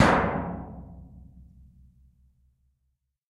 Plat mŽtallique gong f 1
household, percussion